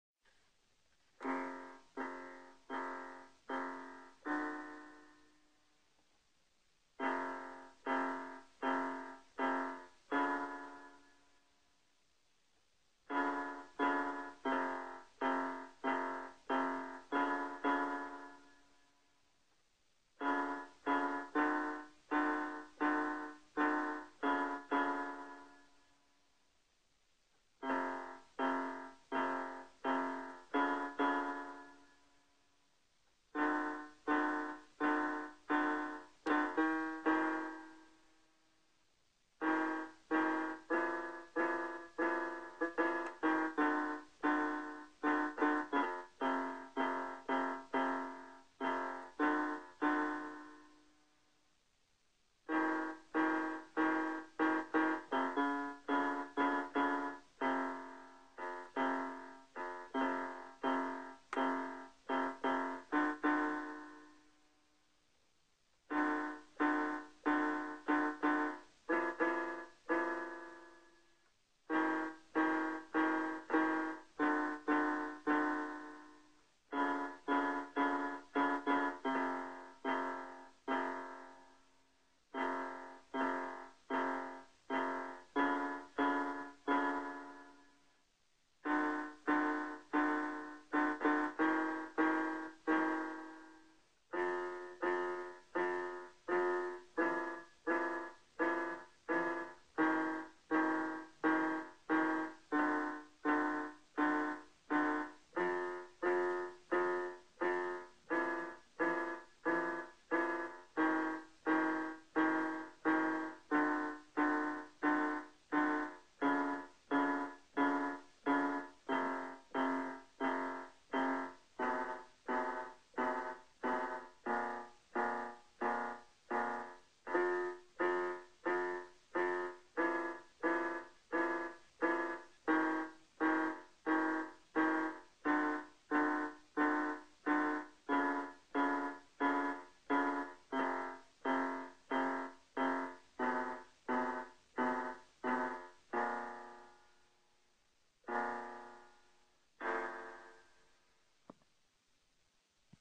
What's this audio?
Where we go?
Melodía demostrando duda, intriga y confusión
Melody demonstrating doubt, intrigue and confusion
confusi, duda, go, intriga, n, we, where